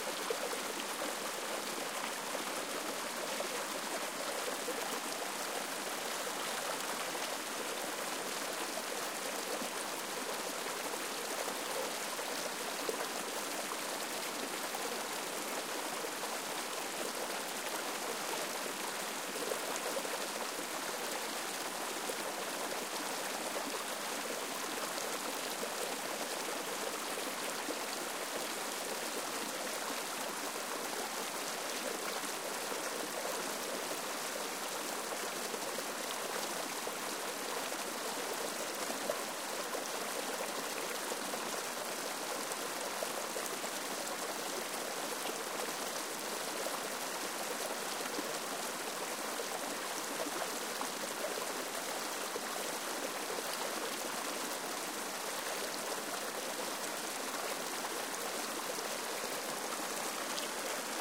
All in pack recorded today 3/29/14 on the Cataract Trail on Mt. Tam Marin County, CA USA, after a good rain. Low pass engaged. Otherwise untouched, no edits, no FX.